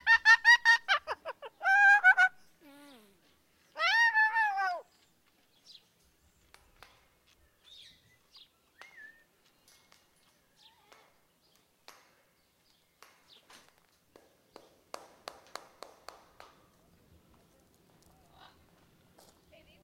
Cockatoo Chatter 01
Recorded with an Rode NTG 2 shotgun and Zoom H2. A chatty cockatoo